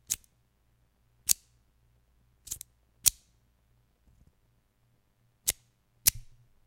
Double action (two blade) deluxe cigar cutter, opening and closing at different intervals. Record with B1 bla bla bla...
snip, snap, cigar, cutter